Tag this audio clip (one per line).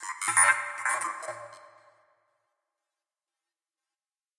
alien
beep
computer
spacious
synthetic
voice